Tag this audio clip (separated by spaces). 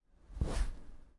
Movement swipe cloth zoom